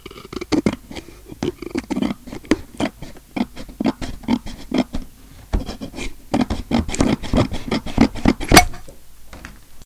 Edgar Scissorhand cuts a thicker paper used for printing out photos on glossy paper at the office.